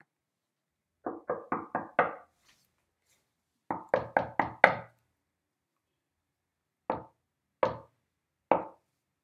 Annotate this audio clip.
Knocking a door